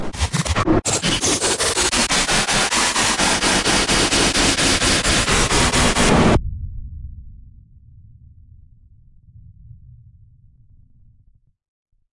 Rhythmic Noise 1